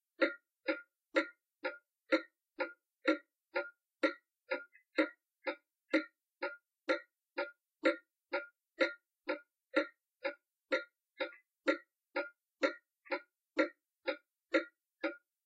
clock-ticking-01
This is an old (pre-1950) Junghans wall-mounted clock. This clip is the clock ticking. Noise removal has been applied to the clip. Recorded in living room.
3, bar, clock, enclosure, ticking, tuned, wall-mounted